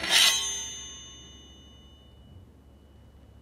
Sword Slice 19
Nineteenth recording of sword in large enclosed space slicing through body or against another metal weapon.
slice, foley, sword-slash, slash, sword, movie